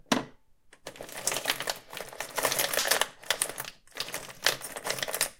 Coffee Machine - Select Pod
Selecting a coffee pod from box
appliances, kitchen, boil, cup, brewing, time, tea, kettle, maker, coffee-maker, brew, steam, water, espresso, hot, machine, coffee, appliance